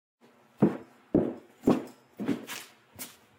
These are boots recorded on set as part of the production tracks.